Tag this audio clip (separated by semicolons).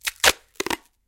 duct-tape; rip; ripping; tape; tear; tearing